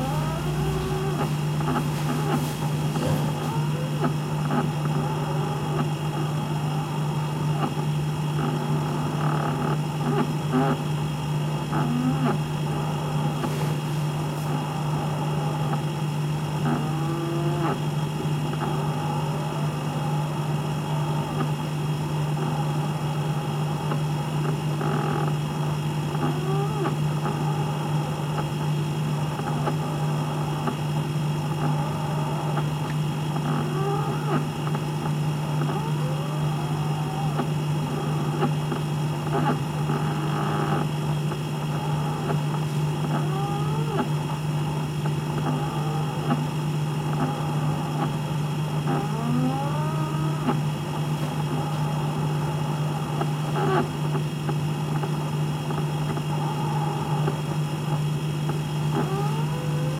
Noisy fridge
The sound of a noisy refrigerator recorded by me on a Tascam DR-05.
appliance, fridge, kitchen, noisy, refridgerator, refrigerator